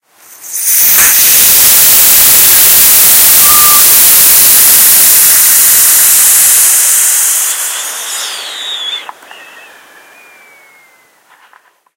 PRESSURE RELIEF VALVE
This is a new sprayer we bought and it's nice to be able to relieve the pressure by turning a small, built-in valve. Similar to a compression relief valve on engines, I suppose. I recorded it with my Yamaha 'Pocketrak' recorder and edited on Sony Vegas. Thanks. :^)
Valve, Relief, Pressure